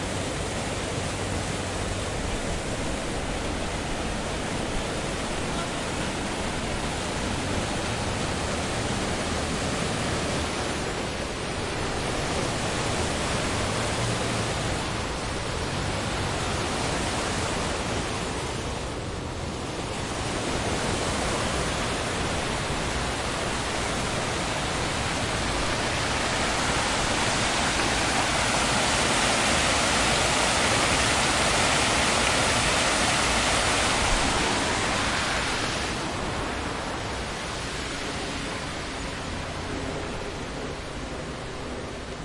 Son d'une cascade dans un parc anglais. Son enregistré avec un ZOOM H4NSP et une bonnette Rycote Mini Wind Screen.
Sound of a waterfall in an english park. Sound recorded with a ZOOM H4NSP and a Rycote Mini Wind Screen.